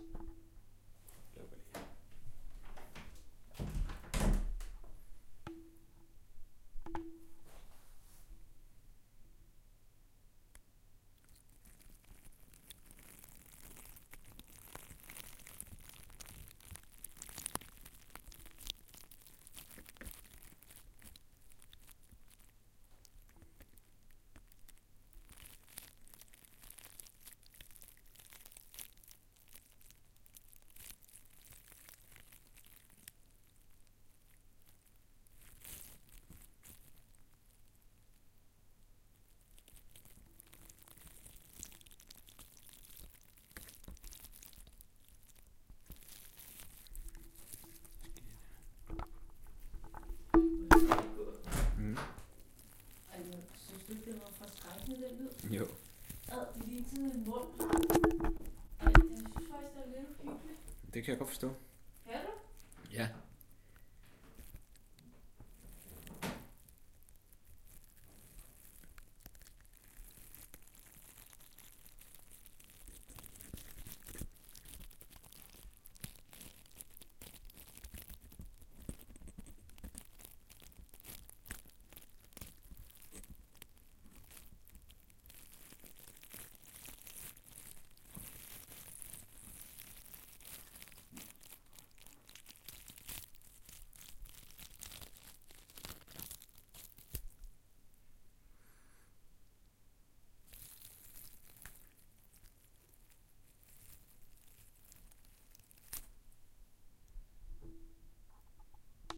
Rotten cactus
Playing with a rotting cactus and pulling on the thorns until it snaps in two. Recorded on Zoom H4n.